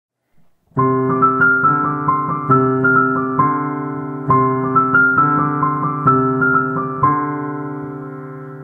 A school project piano sample!